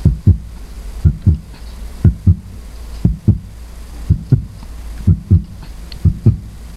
efecto vocal creado con la garganta cambiando el pitch